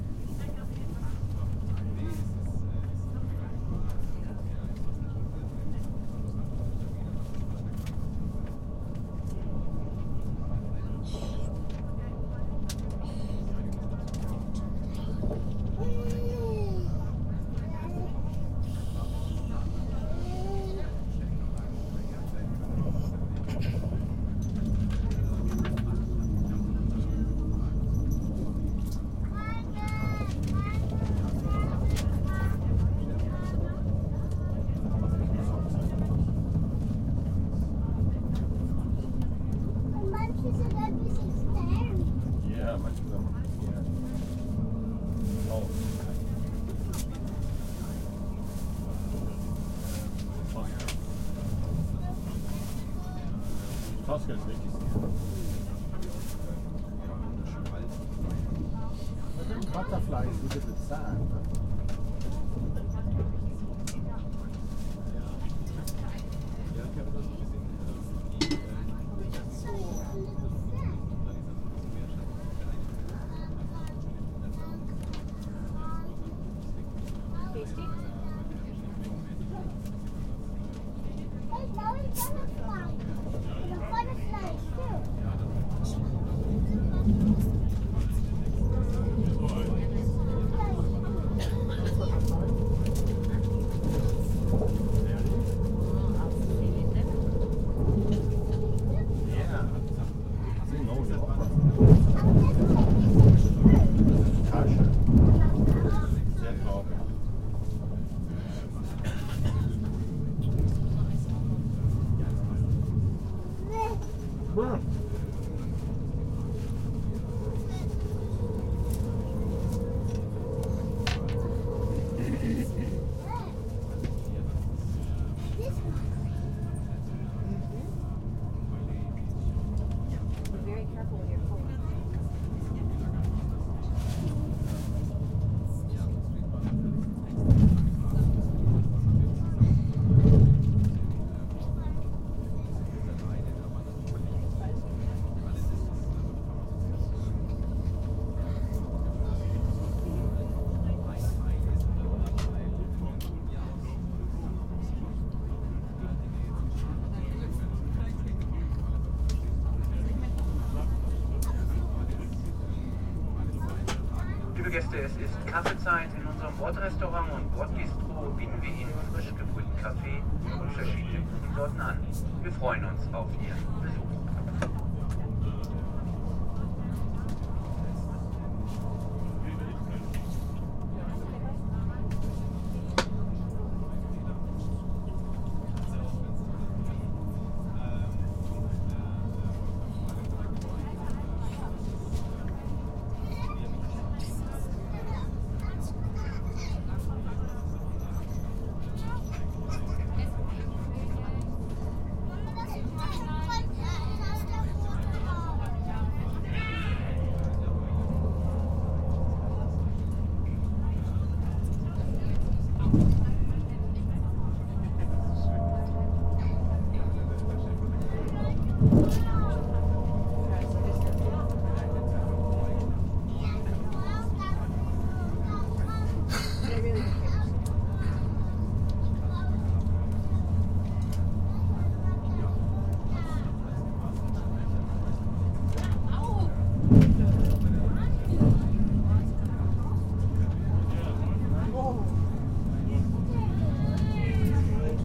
crowded train
A few minutes of a trainjourney on a fasttrain in Germany using the inside microphones of a Sony PCM-D50. Plenty of talk.